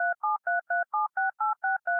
A slower telephone dial tone generated in Audacity with it's DTMF tone generator.